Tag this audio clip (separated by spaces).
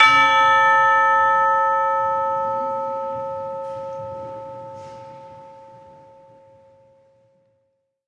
alesis arts audio avenue bell c617 canada chime chiming church e22 hanging josephson live media metal millennia npng orchestral percussion pulsworks ring ringing saskatchewan saskatoon third tubular united